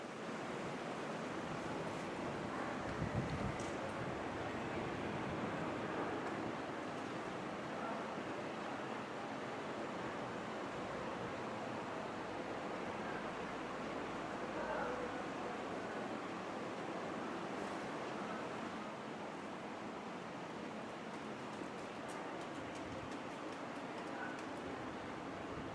Ambiente - nocturno tranquilo
night Environment
MONO reccorded with Sennheiser 416